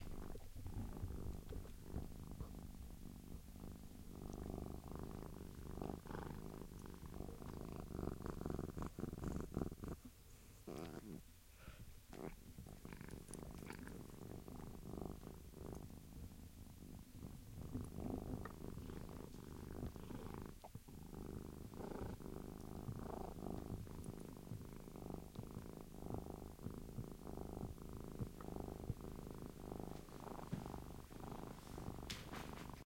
my cat purring
animal, cat, cats, pet, pets, purr, purring